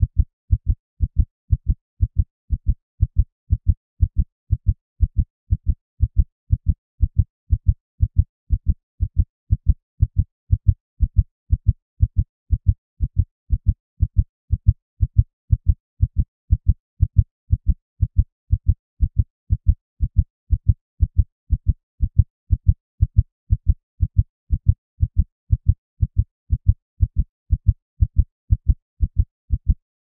heartbeat-120bpm
A synthesised heartbeat created using MATLAB.
body, heart, heart-beat, heartbeat, human, synthesised